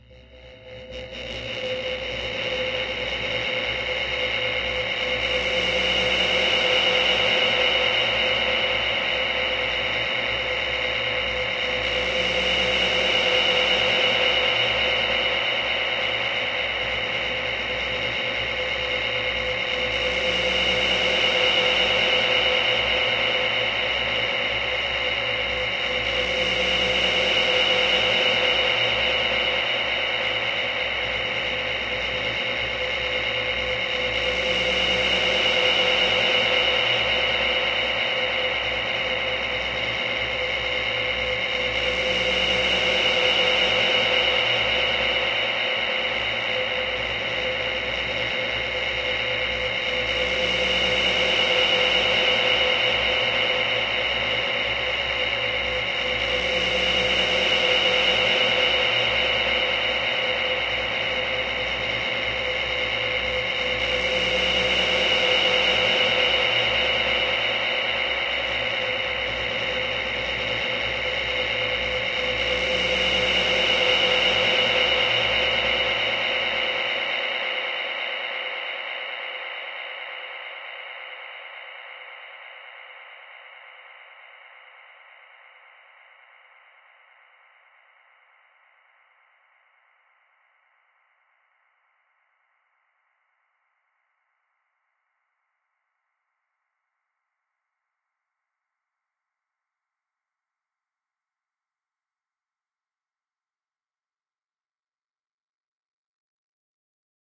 I recorded this for a college audio project by dragging a contact mic I made across a hallway wall I was walking down. I added a few unmatching delay effects and some feedback.
contact-mic, delay, noise, drone, creepy